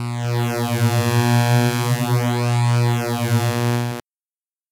burg guillaume 2012 13 son3
Lightsaber sound
Cheminement :
Dent de scie (Fréquence 120 Hz, Amplitude 0.4) + Phaser (10 phases)
Puis duplication de la piste + décalage de la piste 2 (glissement temporel) + Phaser (11 phases)
Puis pour les deux pistes : Amplification (-5 puis -2.2) + Outil de niveau + Ajout d’un silence à la fin
Typologie :
Continu varié
Morphologie :
Masse : groupe de sons
Timbre : froid, mouvant
Grain : rugueux, métallique
Allure : écho et vibrato
Dynamique : Attaque abrupte (effet désiré d’un sabre qui s’enclenche) et relativement violente
short, lightsaber